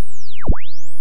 clothoid 1s imag
Imaginary part of the clothoid curve (Euler spiral) as complex analytic signal.
synthesis, spiral, function, clothoid, euler